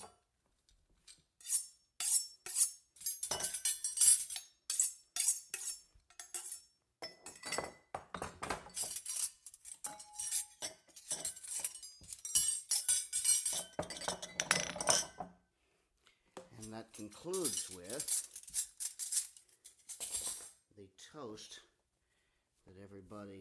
foley - fiddley bits
Misc metal things knocked together - could be a key ring... could be a set of throwing knives... could be the maid making off with the family silver... (or copper now a days)
SonyMD (MZ-N707)
metal, foley, jingle, jangle